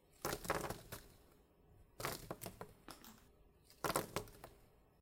Puffy Chips Falling On Table
Dropping a handful of chip puffs on a table.
chip, fall, patter, puff